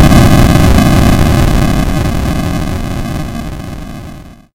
Retro, Explosion 06
Retro, explosion!
This sound can for example be triggered when a target is destroyed - you name it!
If you enjoyed the sound, please STAR, COMMENT, SPREAD THE WORD!🗣 It really helps!
blow, blow-up, bomb, boom, death, demolish, destroy, destruct, detonate, explode, explosion, game, retro, up